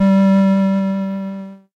Part of my sampled Casio VL-Tone VL-1 collectionfantasy preset in high C short hold. Classic electronica of the Human League 'Dare' era